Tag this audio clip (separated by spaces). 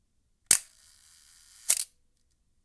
shutter pro-tools focal camera 35mm